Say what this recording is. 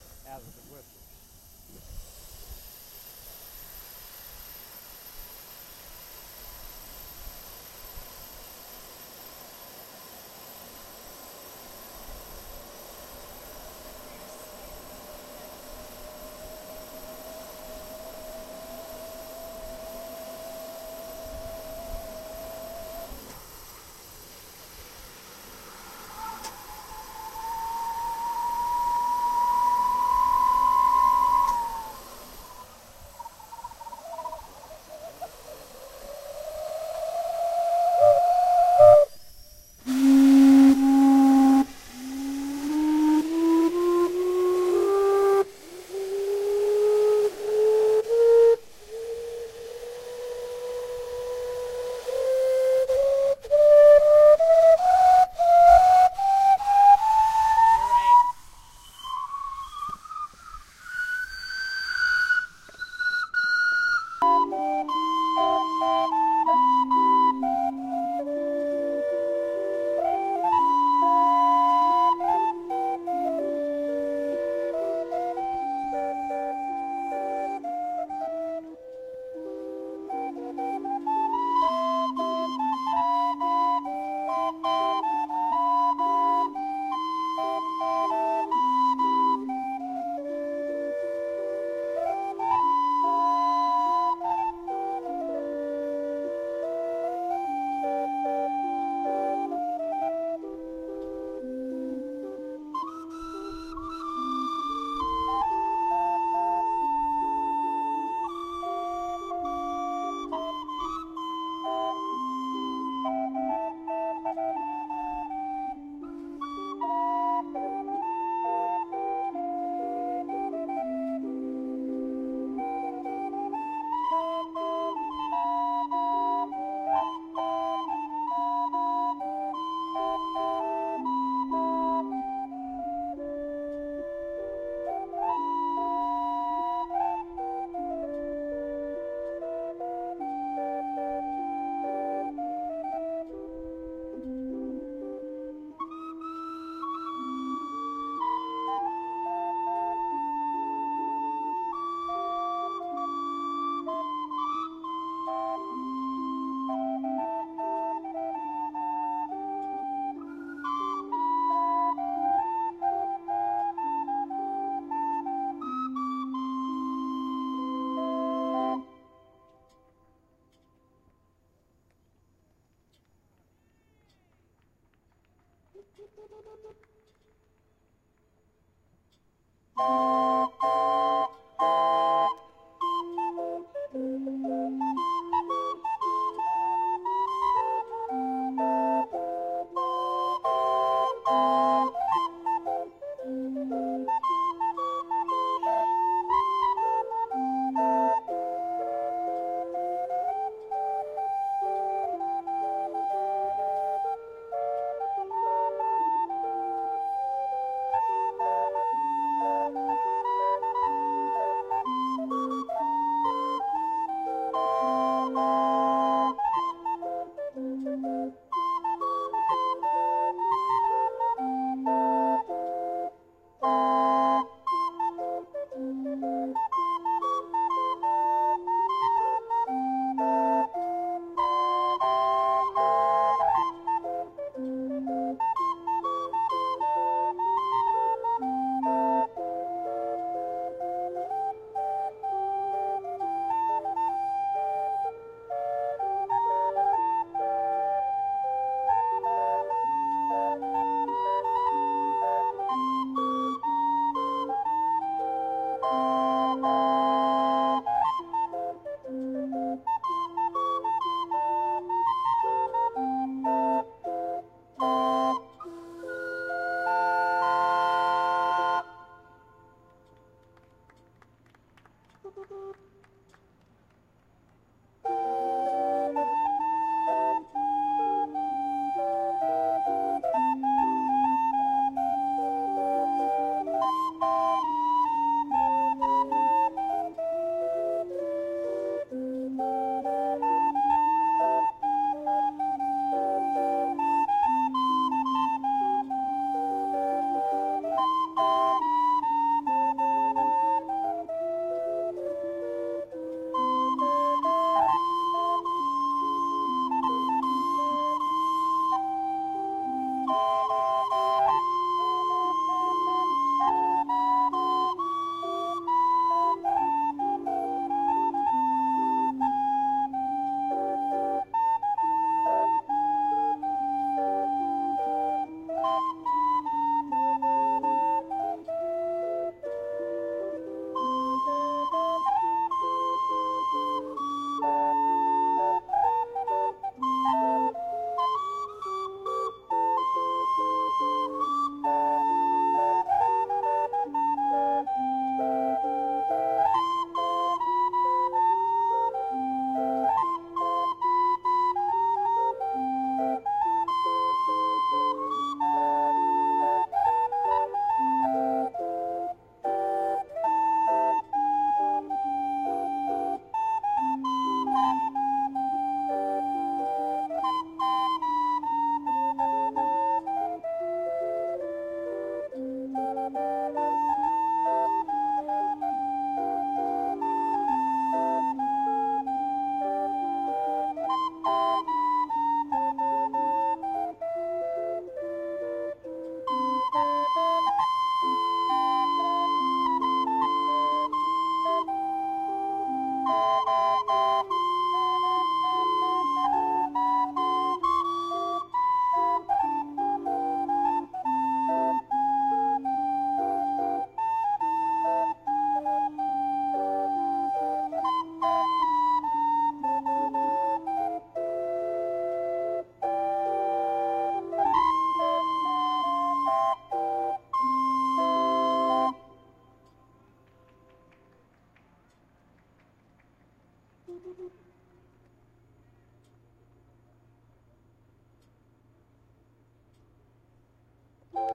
These are songs played on the steamboat Natchez's calliope.